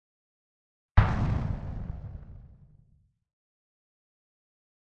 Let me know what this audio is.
Synthesized Explosion 03
Synthesized using a Korg microKorg
bomb, dynamite, explode, explosion, explosive, grenade, synthesis